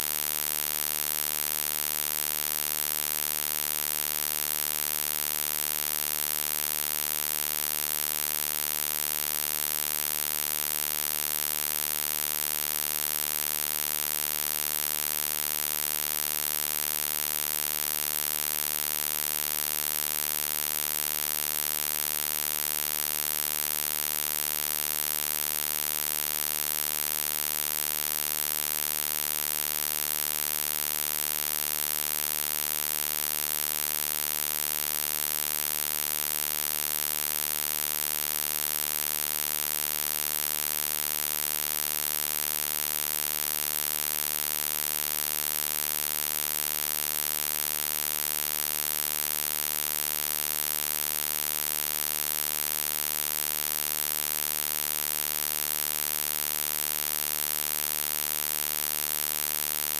18525Hz-Square
18525Hz, square, squareWave, wave